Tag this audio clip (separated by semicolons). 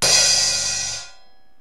live; heavy; splash; drums; crash; hit; e; ride; rock; cymbal; funk; metal